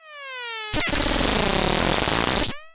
short circuit06
A different noisy laser sort of sound with more glitchy breaking up. Created with Nord Modular synth using FM and sync feedback along with pitch modulation.
modular, digital, fm, modulation, synth, nord, noise, glitch